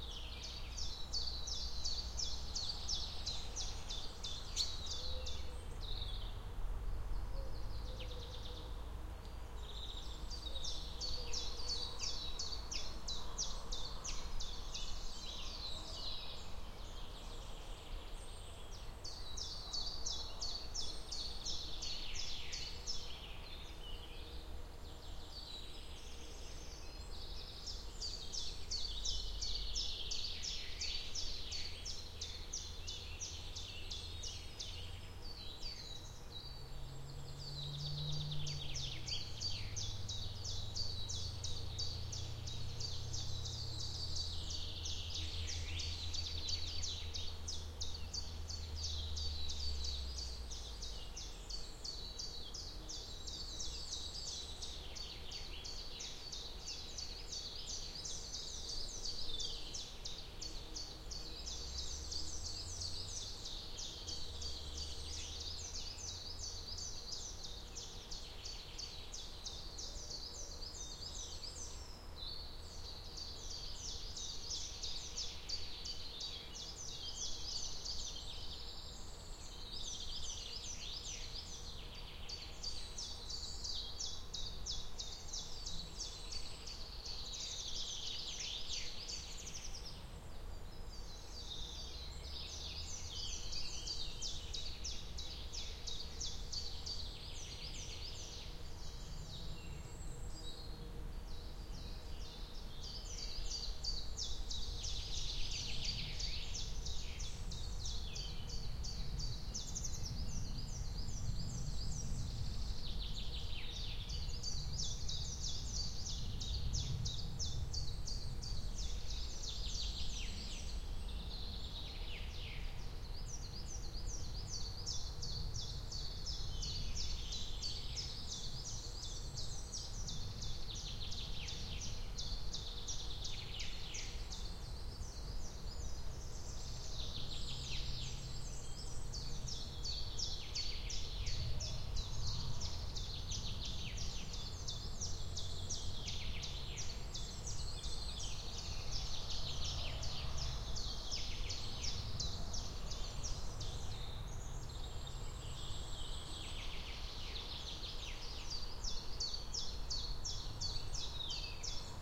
Forest in Spring with distant traffic
Recorded on dawnchorus day 2009 in the Harz mountains Germany. Well and that took place s.th.like 4 hours before and therefore not so much birdsong, but distant traffic instead :( .
AT3032 microphones, Shure FP-24 preamp into R-09 HR.
traffic, field-recording, forest, birdong, birds, harz